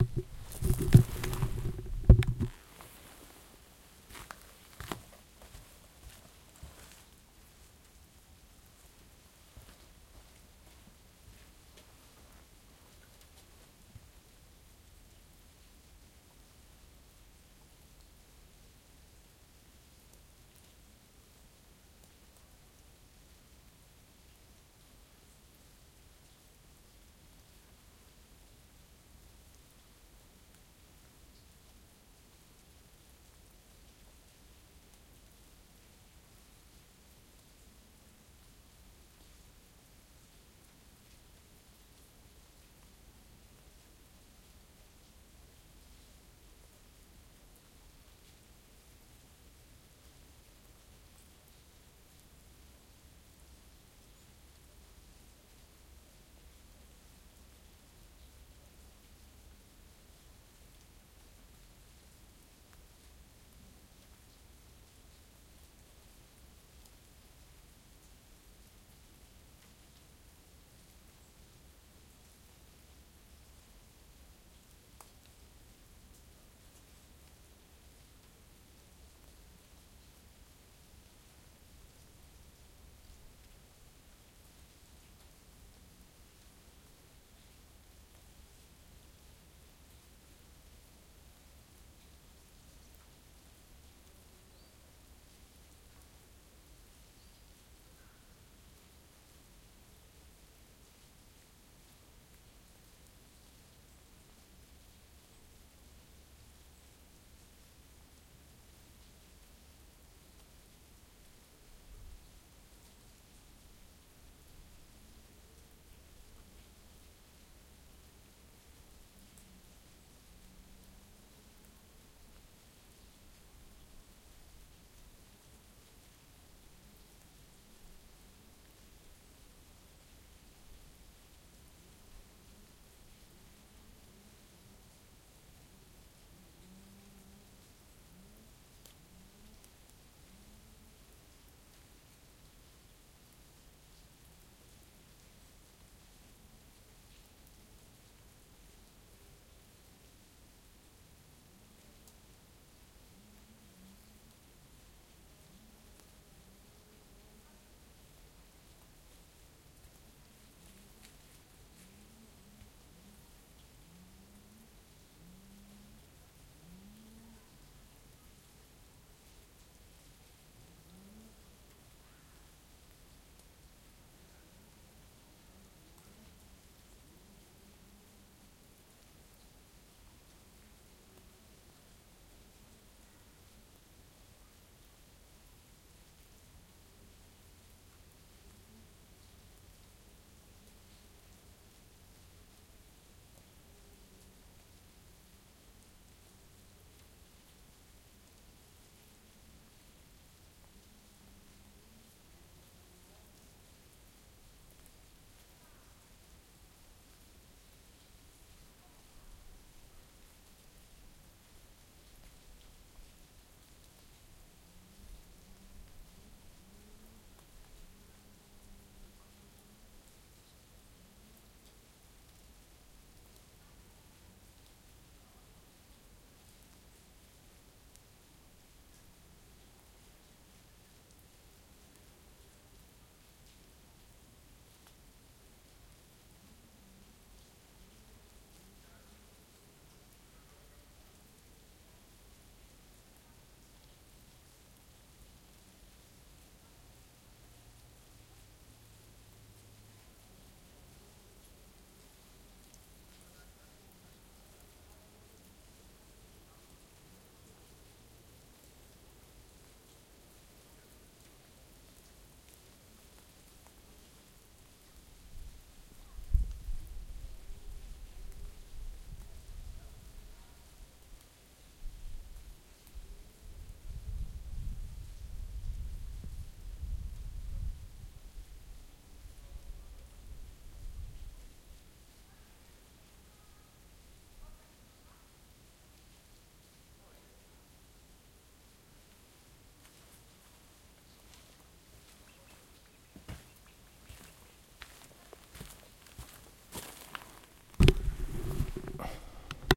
the sound of melting snow on the path high in the mountains - rear
field-recording, high-path, melting-snow, mountains, water-drops